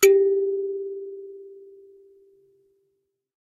Kalimba (note G + harmonics)
A cheap kalimba recorded through a condenser mic and a tube pre-amp (lo-cut ~80Hz).
Tuning is way far from perfect.
african, ethnic, instrument, kalimba, piano, thumb, thumb-piano